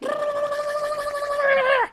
sonokids-omni-15
Part of my unfinished pack of sounds for Sonokids, me making some noise with my mouth while shaking my head really fast. Total nonsense.
male, voice, sonokids, mouth